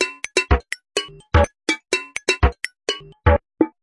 Abstract Percussion Loop made from field recorded found sounds